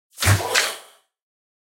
GASP Sweep Load 2
Sound FX for SciFi style equipment action.
Interact, SciFi